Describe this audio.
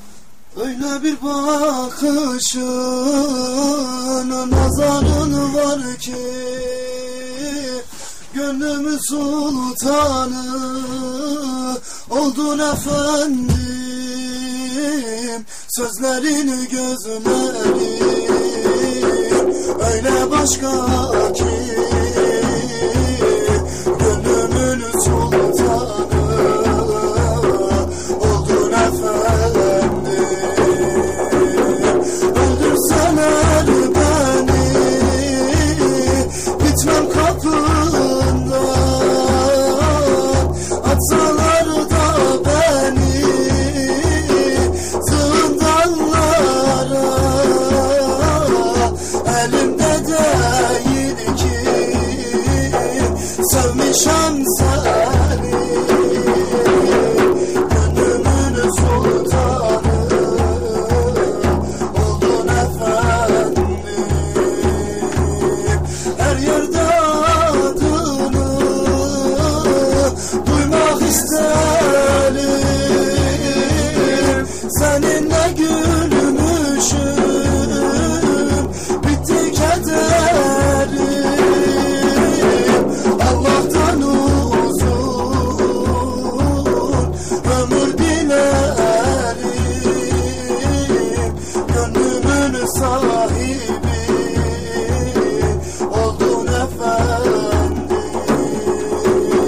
it recorded from: Halilurrahman Mönchengladbach Germany Dergah
Song is Turkish
Songer Sufi is: Faysal